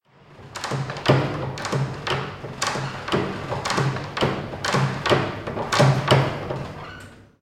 HOW I DID IT?
A record sound of a toilet door handle.
Effects : hight-pitched (-10) ; low-pitched (+3) ; fade in ; fade out.
DESCRIPTION
// Typologie (Cf. Pierre Schaeffer) :
V'' (itération varié) + X (continu complexe)
// Morphologie (Cf. Pierre Schaeffer) :
1- Masse:
Son canelé
2- Timbre harmonique:
sec, métallique
3- Grain:
Rugueux
4- Allure:
Pas de vibrato
5- Dynamique :
Attaque violente
6- Profil mélodique:
Variation scalaire
7- Profil de masse
Site : impulsions de la poignées créant plusieurs bruits se répétant à chaque impulsion.